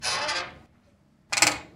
Door Hinge02
Door Hinge creaking open then closing. No sound of door hitting the frame.